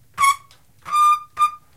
the sounds on this pack are different versions of the braking of my old bike. rubber over steel.
bike, rubber, brakes, field-recording